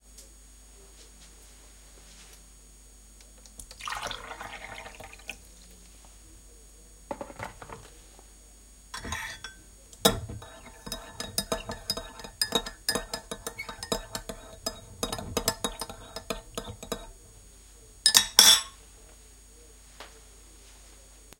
WATER BEING POURED INTOCUP STIRRED
The sound of water being poured into a cup and then being stirred with a spoon.
Sound recorded on mini DV tape with Sony ECM-MS01 CONDENSER MICROPHONE
cup, spoon-stirring, water-pouring